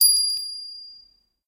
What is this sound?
Small bronze bell.
bell, small